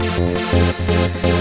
Riff 1 170BPM
short synth riff loops for use in hardcore dance music.
170bpm, hardcore, loop, riff, synth